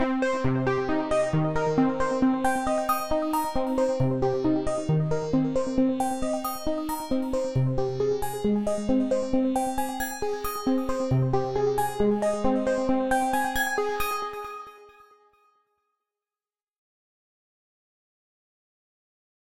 arping beauty 135bpm
melodic trance loop from a track i made using an arpeggiator within gladiator synth
arp, melody, sequence, trance